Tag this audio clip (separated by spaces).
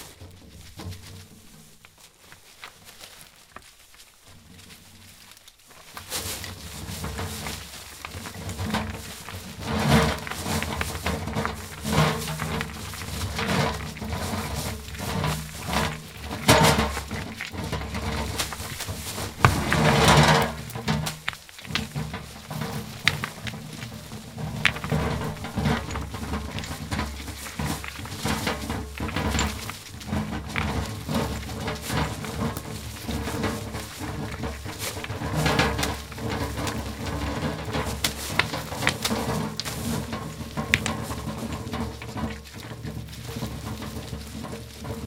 metal rocks rolling rumble